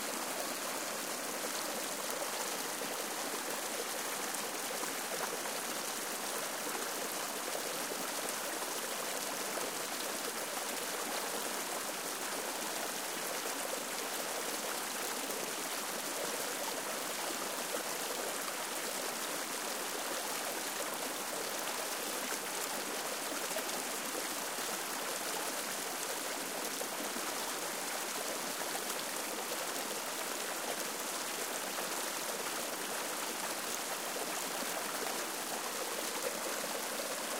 All in pack recorded today 3/29/14 on the Cataract Trail on Mt. Tam Marin County, CA USA, after a good rain. Low pass engaged. Otherwise untouched, no edits, no FX.